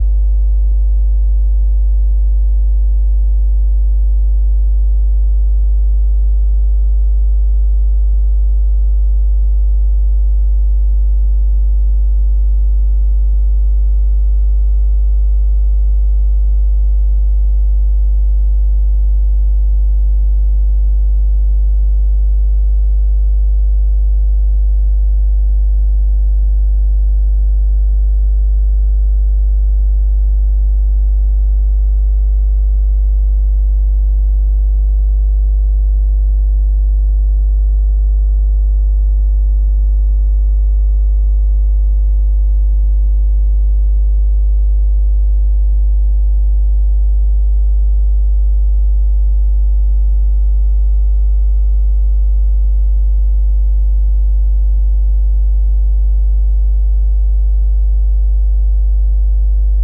50Hz Hum
This is the recording of a 50Hz electric hum.
The sound was recorded connecting an old piezo pickup to the recorder line input.
Line input;
50Hz, buzz, electricity, hum